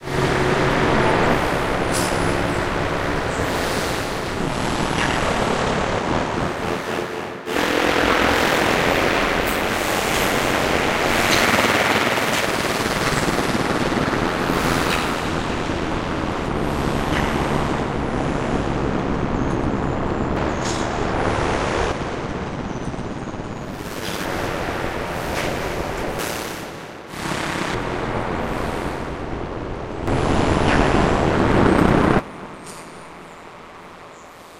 noisy drone sounds based on fieldrecordings, nice to layer with deep basses for dubstep sounds
dub, drones, reaktor, fieldrecording, sounddesign, experimental